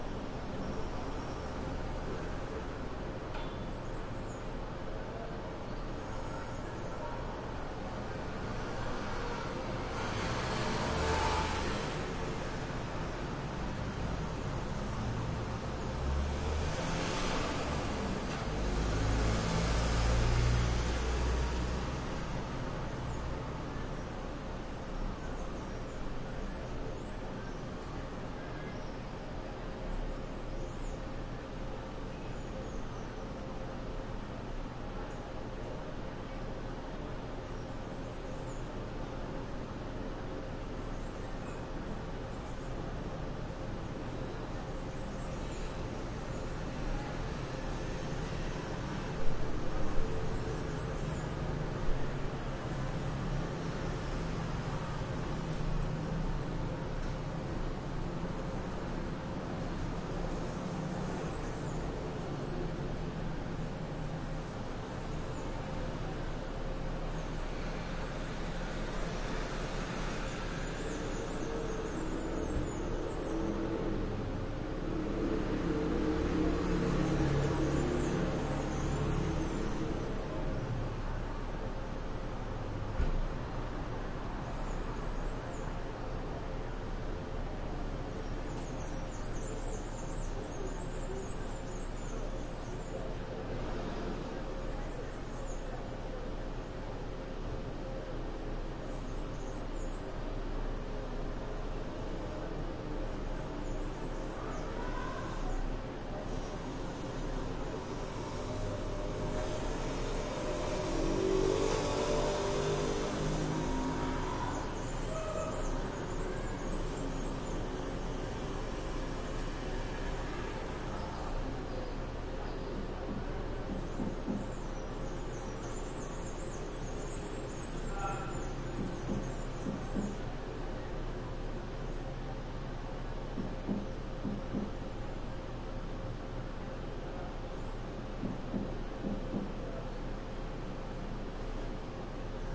room tone office noisy air tone but not air conditioning overlooking street with throaty traffic Sonia's office Saravena, Colombia 2016
room tone office noisy air tone but not air conditioning overlooking street with throaty traffic Saravena, Colombia 2016
room, air, traffic, tone, office, noisy